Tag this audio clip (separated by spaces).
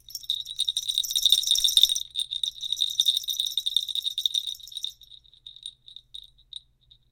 santa claus waving rattle jingle jingle-bell christmas bell shaking